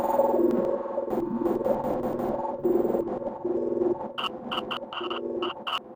betaeight
throaty purring with fragments of static under a slow wah wha effect